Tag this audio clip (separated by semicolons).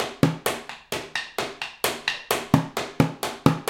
130-bpm; acoustic; ambient; beam; beat; beats; board; bottle; break; breakbeat; cleaner; container; dance; drum; drum-loop; drums; fast; food; funky; garbage; groovy; hard; hoover; improvised; industrial; loop; loops; lumber; metal; music